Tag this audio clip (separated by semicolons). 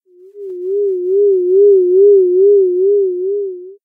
animation; Japan; Japanese; movie; film; cartoon; video; game